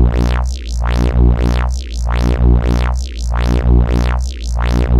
Saw Buzz
A little sound i made, going to use it for FL Studio growls, made in audacity and used phaser and wahwah filters. Use it for freeeeeeeeee